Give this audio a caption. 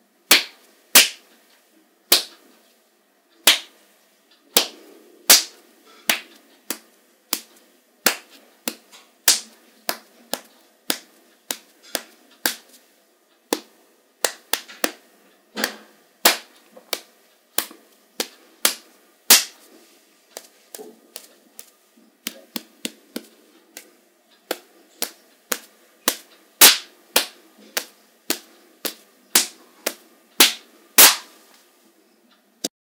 slap slaps hit punch punches foley
hit, slaps, punch, punches, slap